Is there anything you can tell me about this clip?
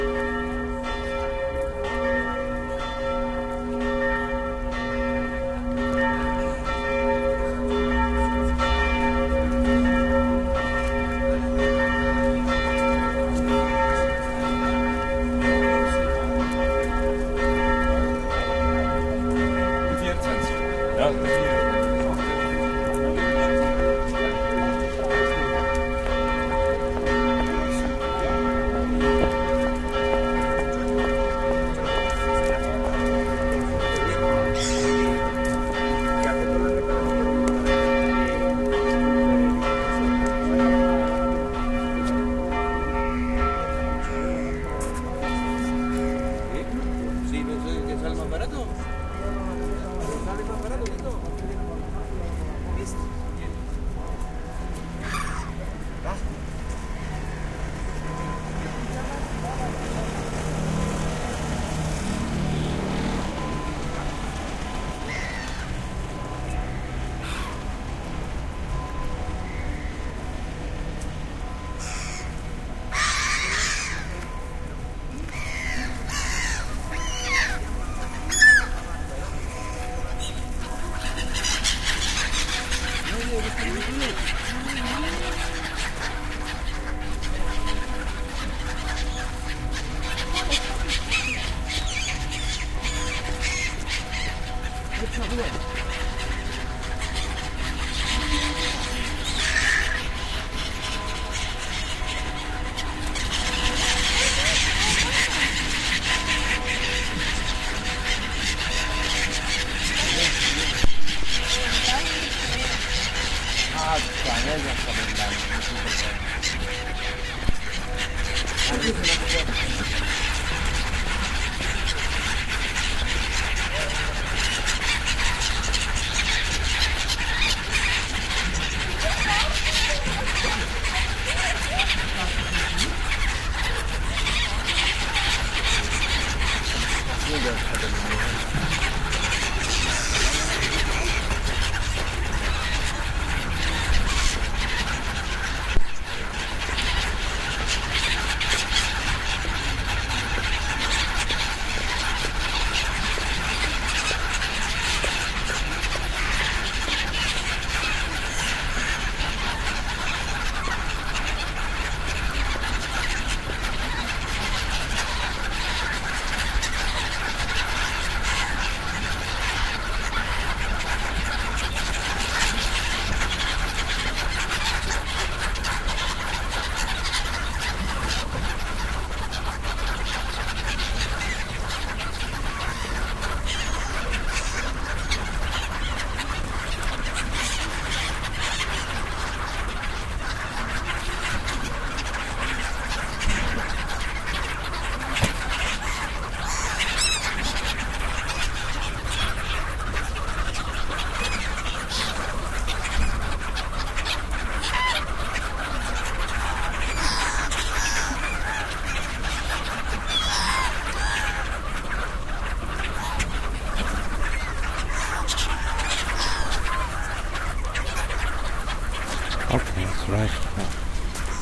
This was recorded near the famous foot-bridge in Luzern in December 2008. The seagulls were being fed and were quite aggressive! I used the M-Audio Mk-II microphone
car-motor, church-bells, city-collage, seagulls, swiss-speaking